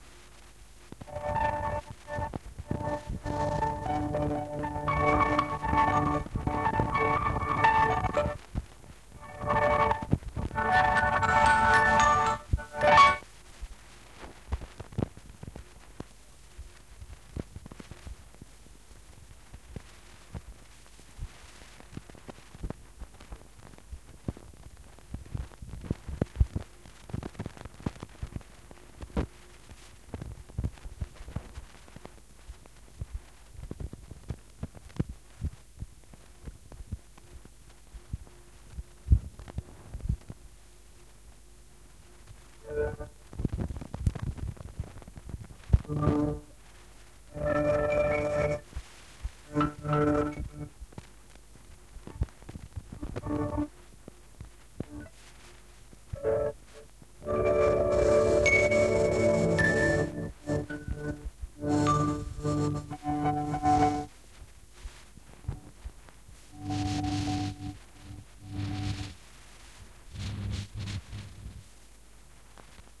tape way 3rd
I took a magnet and attempted to erase the recording, and then crumpled the tape a lot. The end. See other ‘tape way’ sounds in this pack.
cassette, lo-fi, noise, silence, tape